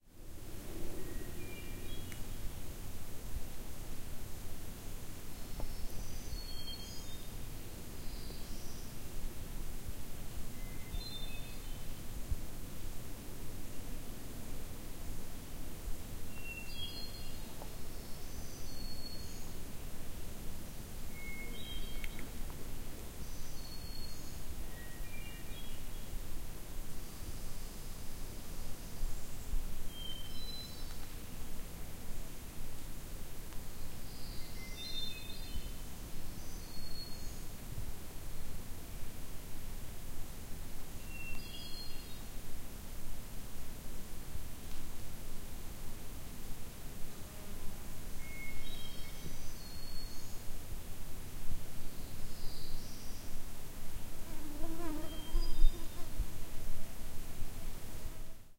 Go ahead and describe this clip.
Recording on a trail at Mont-Belair , Quebec, Canada. Slight wind in the leaves, a thrush and other small birds can be heard, as well as a curious mosquito who got near the mics at the end of the recording. // recorded with zoom H4N
field-recording, mosquitos, nature, woods